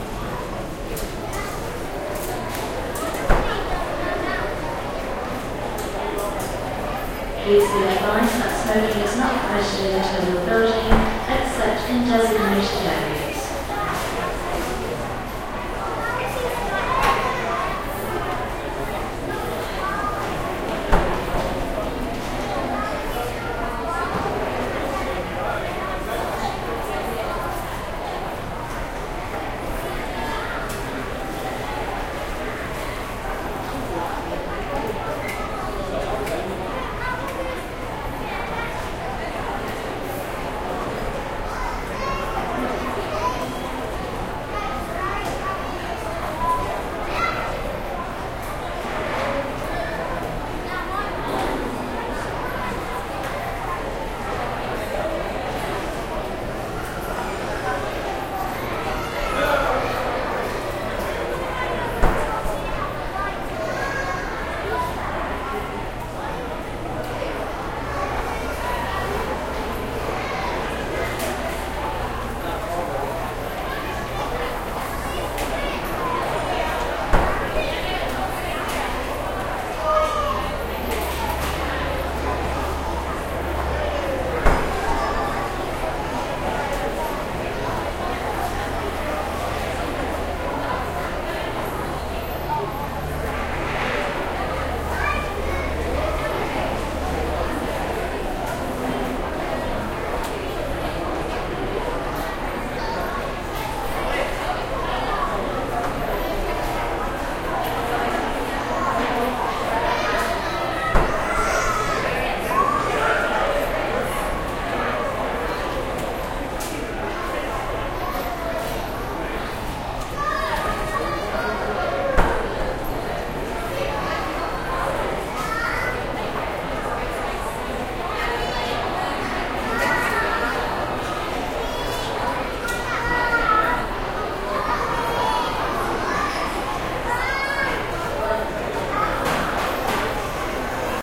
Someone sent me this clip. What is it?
AIRP0RT.
Recorded at Nottingham East Midlands Airport in the Departures lounge during the Aug 06 security alert. A busy Crowd with a tannoy announcement in the background. Recorded on a Edirol R-09 at 44.00 Khz in 16bit mode 23Mb
airport-departures, holiday, tannoy